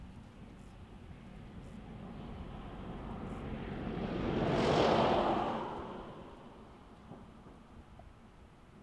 English Countryside (Suffolk) - Car Drive-by - Distant
A car drives by in the distance whilst out in the English countryside of Suffolk -- If you find this sound helpful, I'm happy to have a coffee bought for me ☕ (but you don't have too!)
♪♫ | RK - ☕ Buy me a coffee?